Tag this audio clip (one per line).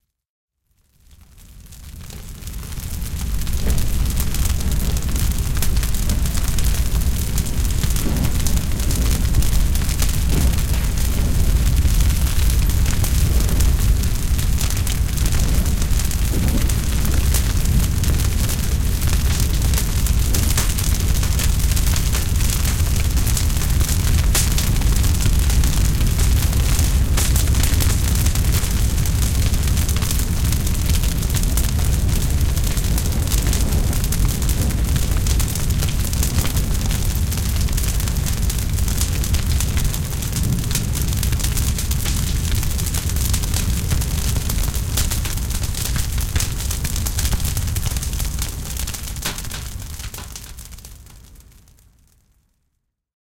competition
environmental-sounds-research
fire
forest
processed
recording
trees